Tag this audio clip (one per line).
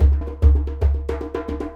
african
djembe
drum
fast
hand
loop
loopable